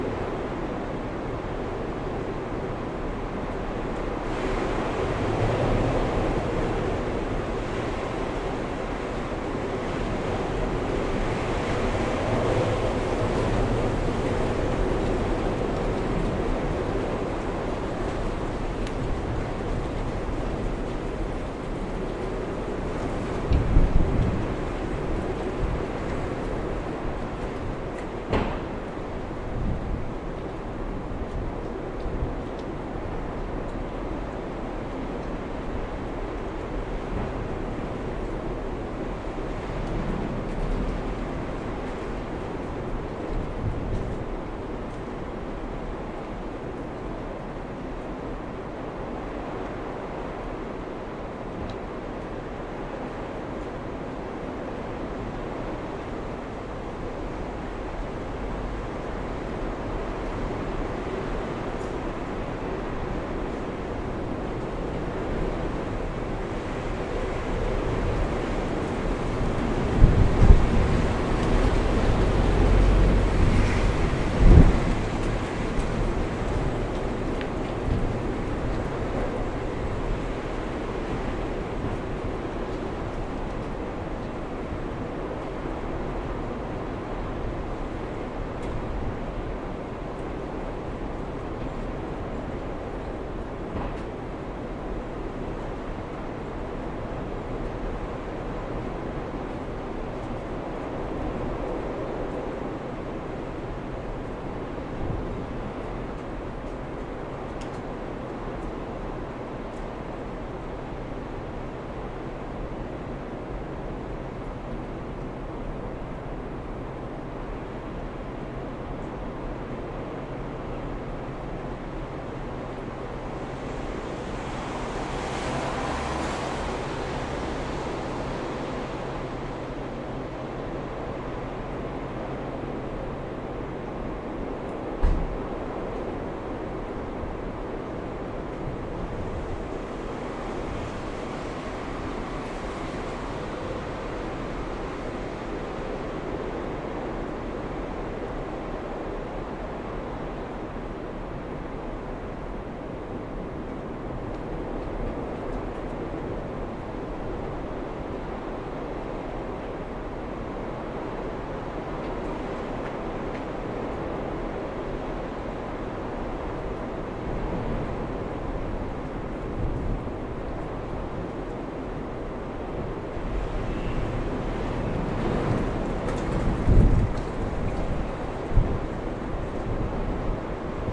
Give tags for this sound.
woosh; storm; wind

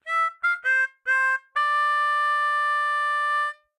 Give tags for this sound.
C; Harmonica; Key; Rift

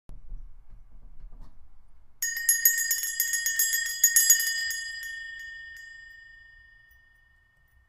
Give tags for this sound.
Bell; ring; ringing

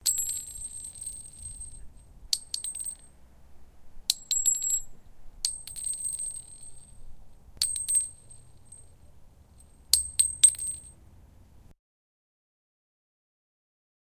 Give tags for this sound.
casing; concrete; shell; multiple-takes; brass; metallic; drop; field-recording; impact; metal; hit

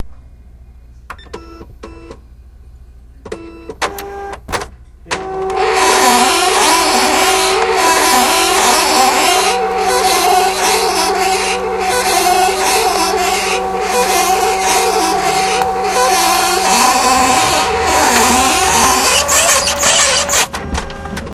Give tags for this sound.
printer; squeak; noise; awful

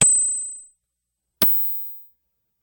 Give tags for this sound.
cymbals; analog

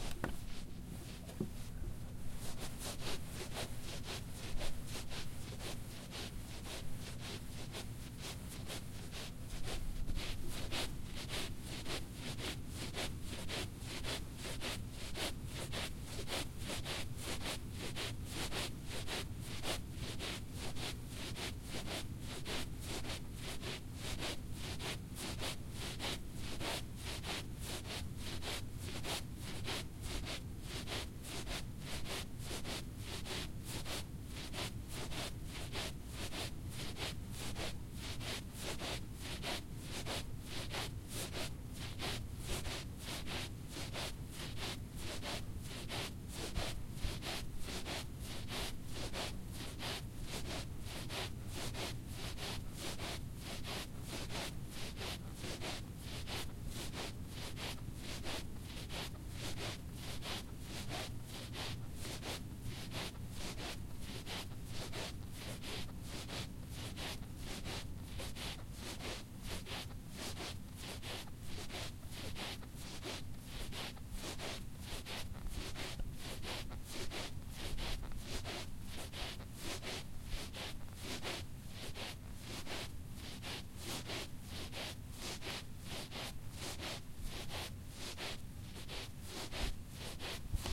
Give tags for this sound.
dragging-feet; feet

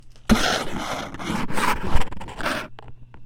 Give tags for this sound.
Foley; sample

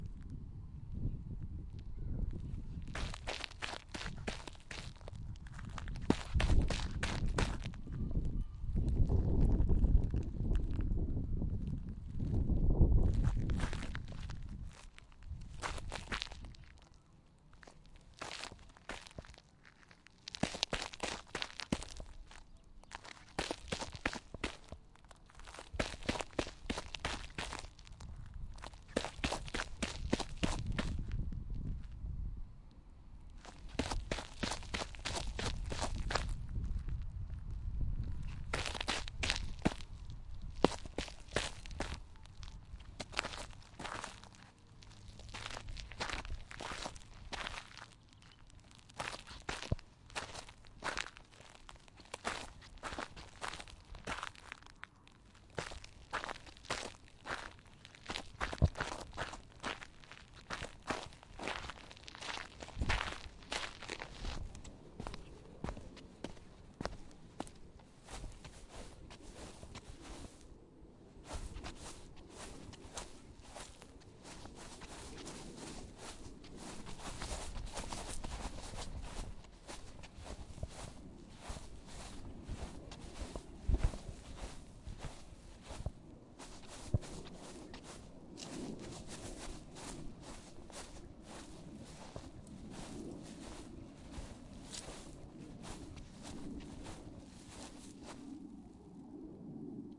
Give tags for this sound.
chelius; footstep; footsteps; gehen; going; gras; j; kiesel; kieselsteine; laufen; pebbles; rennen; rgen; running; schloss; steps; walking

